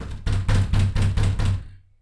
Me banging on my wooden apartment door at 1 AM in the morning. Noise reduced and reverb added.